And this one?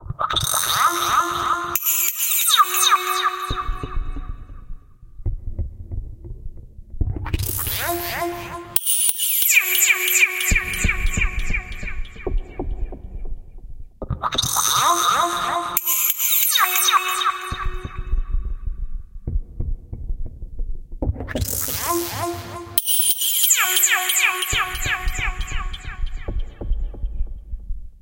This file was created using Reason 3.0. A Combinator patch was created based off of a Subtractor routed through a Malstrom, Unison and RV7000 (used as an Echo), then split with varying amounts passing through another RV7000 (used as a Hall Reverb) and a Phaser. Modulation is performed using the Malstrom LFOs and two Matrix sequencers. The mixdown was then compressed in CoolEdit Pro.